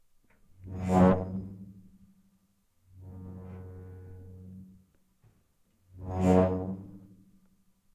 iron hinge creak
This is the sound of the large iron gate closing on the block of flats where I live. I've included three different versions for all to enjoy/use, it has some very deep resonance going on there. Recorded with Zoom H2 portable recorder and external condenser mic.
field-recording, iron, ship-hull, stressed-metal